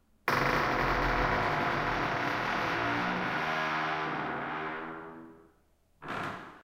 Heavy door groan and creaking in reverberant space. Processed with iZotope RX7.)